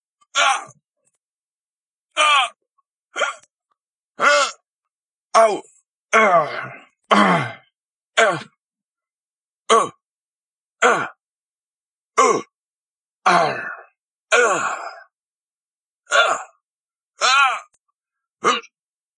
Some sounds of hurt. Recorded on a phone